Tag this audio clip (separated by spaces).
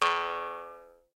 folk folklore jews-harp lips mouth-harp tongue vargan